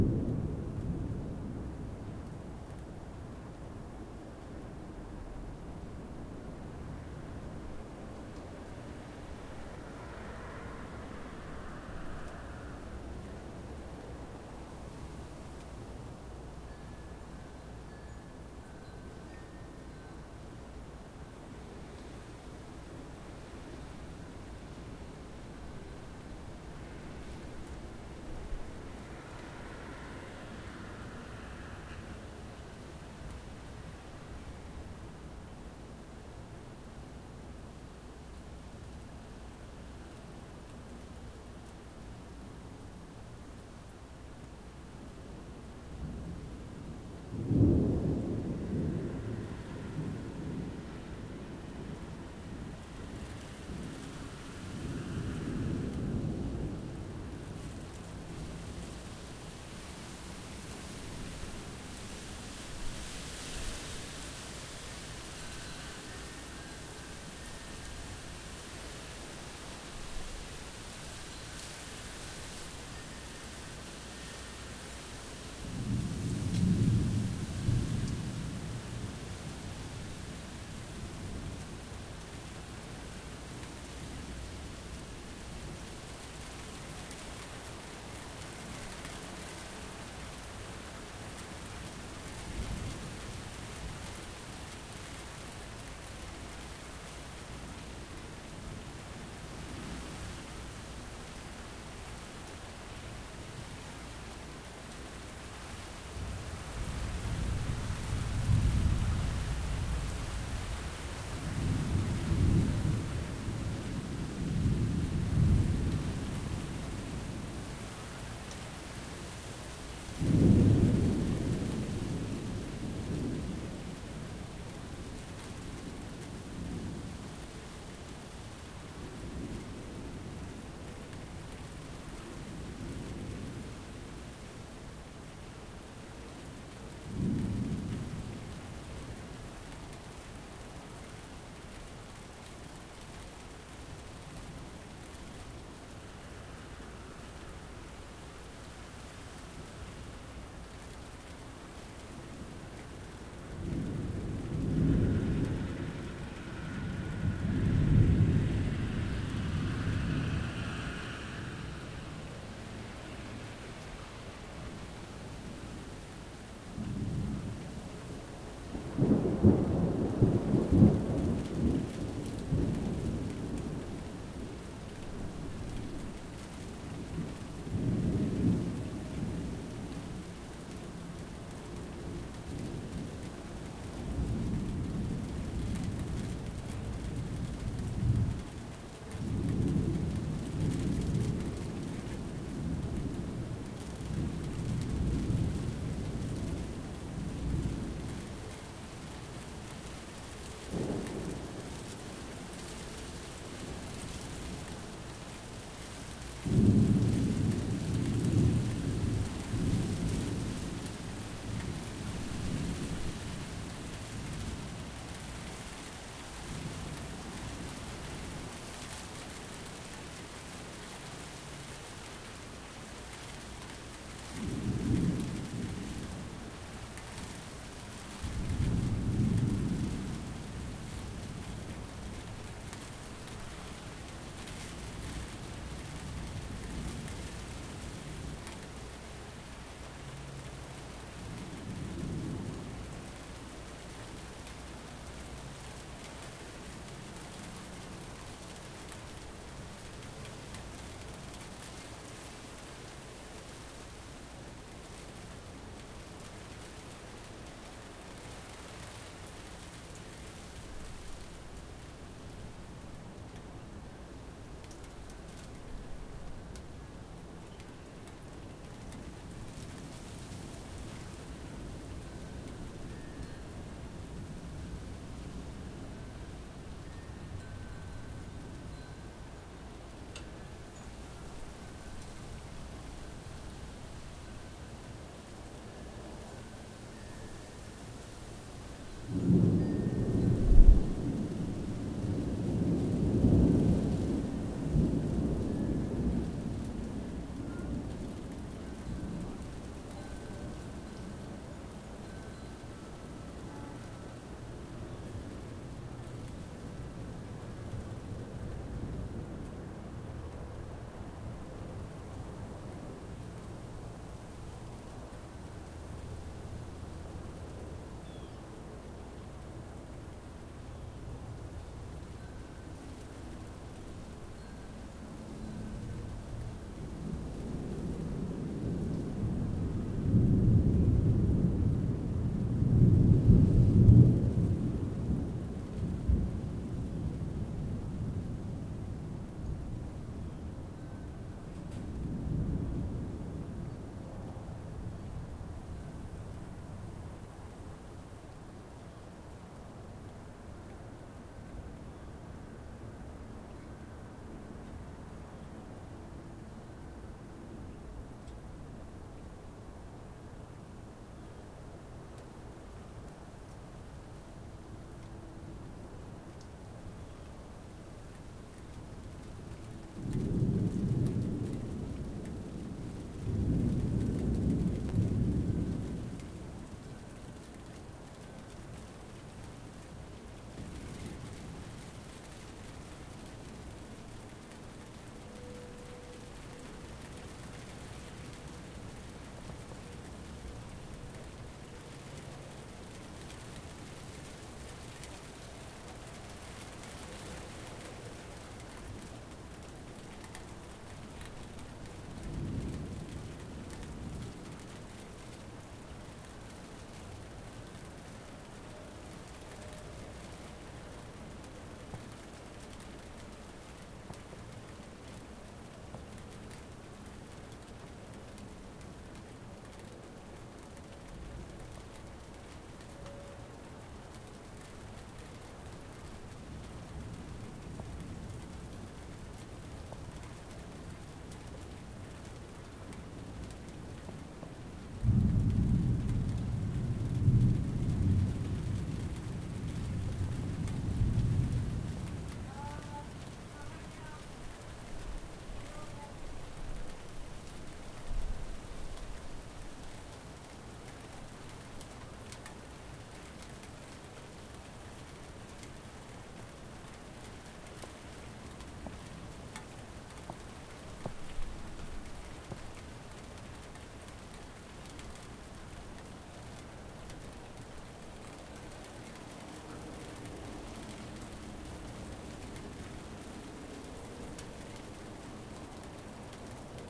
Frustration from lack of loud thunder recorded with laptop and USB microphone.
field-recording, rain, thunder, weather